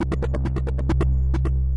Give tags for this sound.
club fast free hard loop sound synth trance

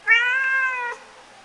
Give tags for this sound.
animal cat voice

